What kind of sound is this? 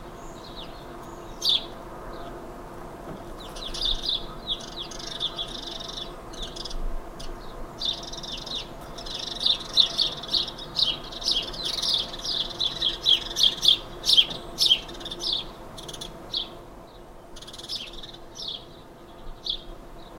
House sparrows chatter in my garden in August 2006 - a minidisc recording.